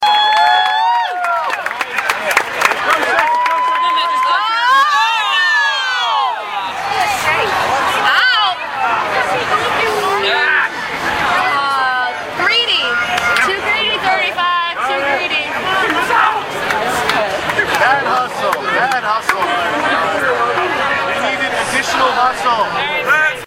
Someone yells greedy play at a cyclones game.
play, greedy